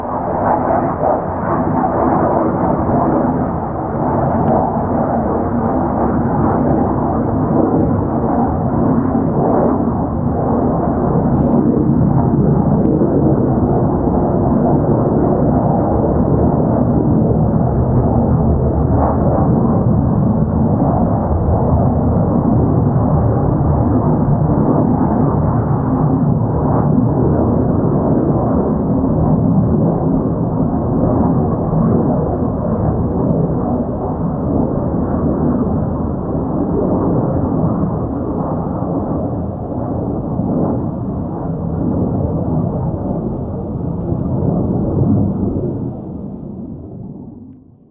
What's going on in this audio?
The sound of a fighter-jet in France in September 2020. Recorded by me on a Tascam DR-05.
air-force, plane, jet, military, combat, fighter-jet, avion, fighter, flying